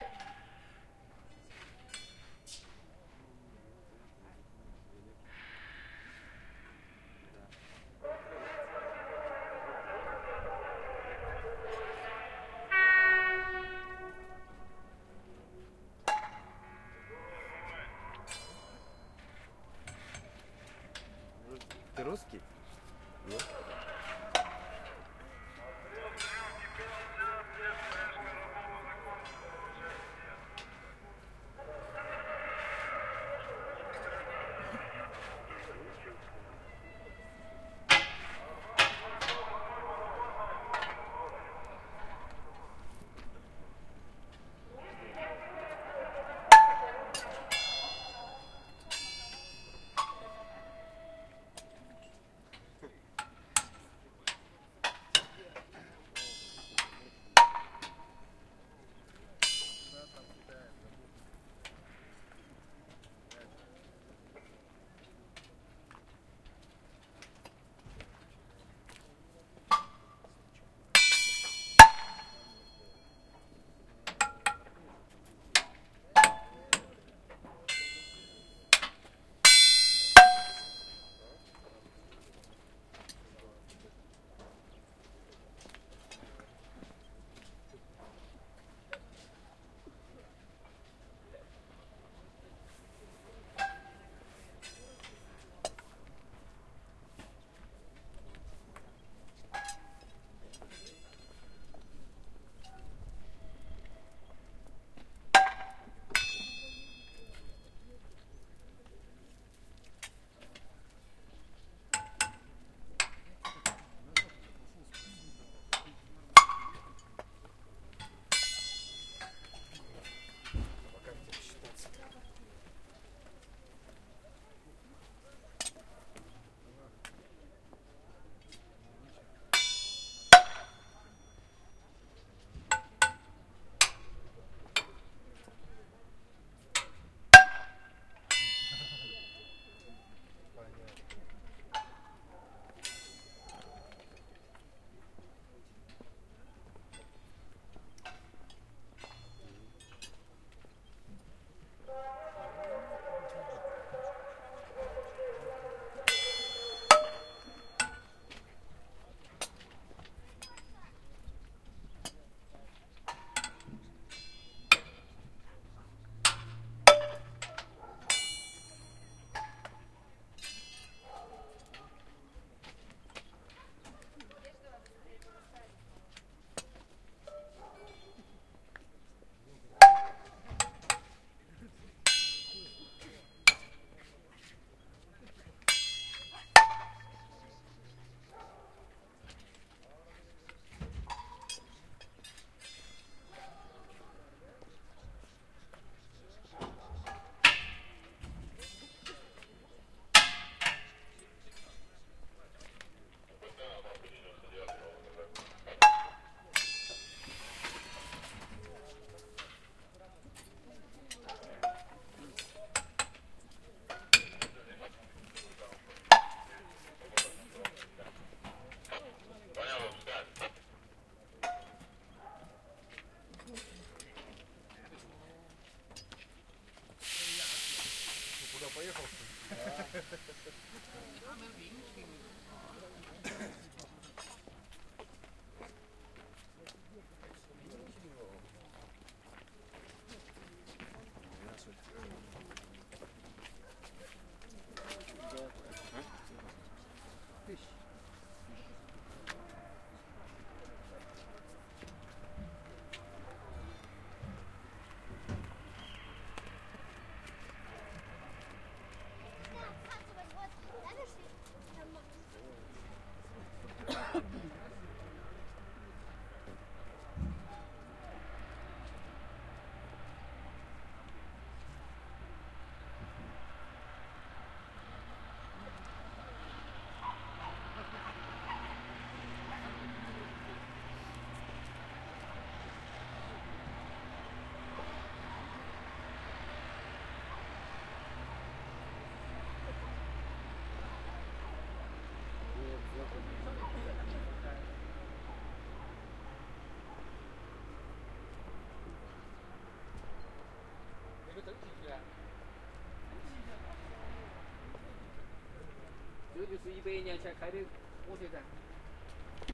Break check at a station, echoey announcements in Russian. Yerofey Pavlovich station
On platform. Train break check, metal-on-metal. Mallets hitting train parts. Bright cling sounds and mellow klongs. Echoey announcements. Someone asks if I'm Russian. Recorded with Tascam DR-40.
bright, field-recording